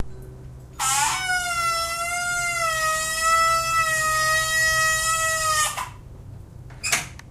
Horribly offensive sound of the plastic sprayer on our hose.